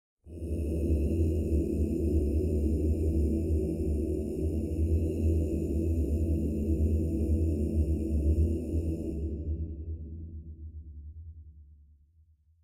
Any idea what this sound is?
Chorus Low Note
This is a few takes of myself layered and pitched down with some reverb added, be sure to give a link if you make anything, I'd love to hear it!
Have fun :)
low, old, hum, church, song, deep, Chorus, people, reverb